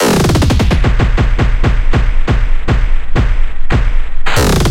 Granular Bass Kick Turn
This is when I was playing around the "Together layer samples technique" using Dblue Glitch.
4x4-Records, Bass, Breakbeat, Clap, Closed, Drum, Drums, EDM, Electric-Dance-Music, Hi-Hats, House, J, Kick, Lee, Off-Shot-Records, Ride, Stab